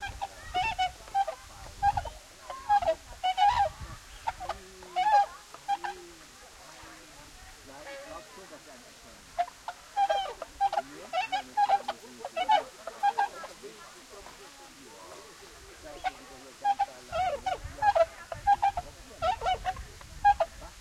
versi cigni
efx, cigno, foley, nature, sound, zoo, sounds, natura, versi